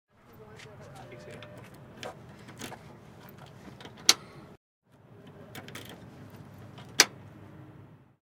Auto Rickshaw - Key in Ignition
Bajaj Auto Rickshaw, Recorded on Tascam DR-100mk2, recorded by FVC students as a part of NID Sound Design workshop.
Auto, Autorickshaw, India, Ric, Richshaw, Rick, Tuk